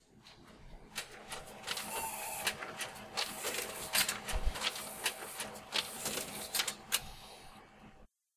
Photocopier printing some papers.